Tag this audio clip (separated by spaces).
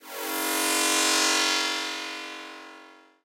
game old reactor space teleport ufo